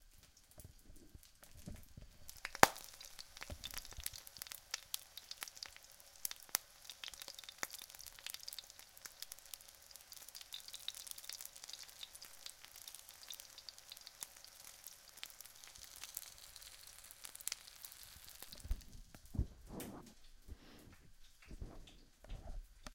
the sound of a frying pan in action in a london house